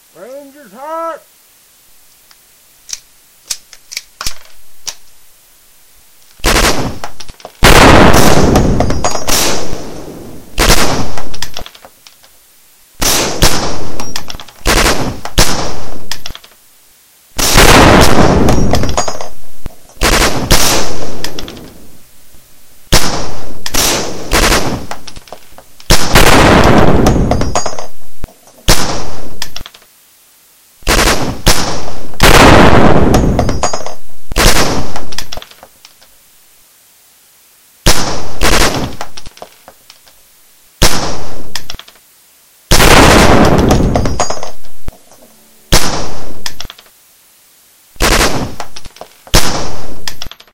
day at the range
This is a homemade sound recording. I used sound effects created by myself and put them together in this recording. It is supposed to represent a shooting range, with the sounds of a .50 cal anti-materiel rifle, an m16 on 3-rounds burst, a Desert Eagle .50 AE, and a 9 mm pistol. features loading sounds as well.